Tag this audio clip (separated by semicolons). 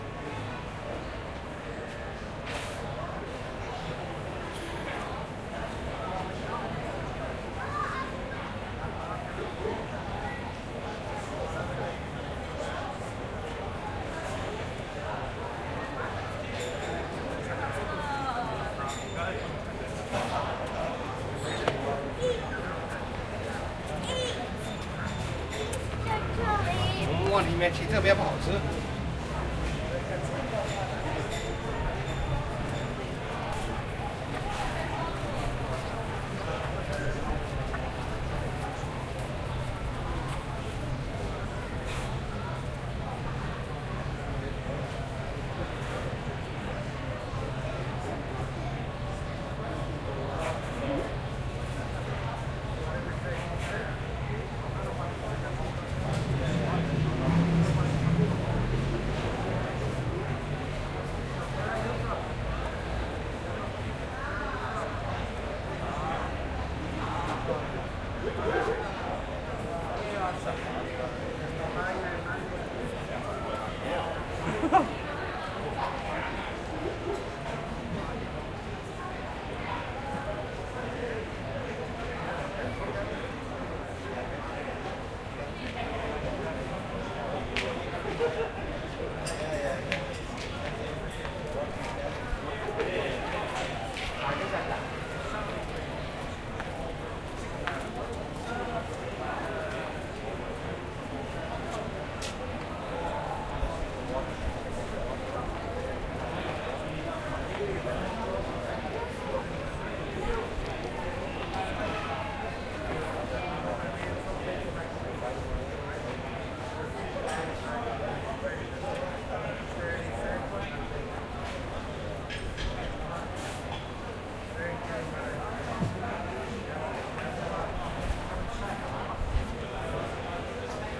chatter; crowd; market; people; talking; voices; walla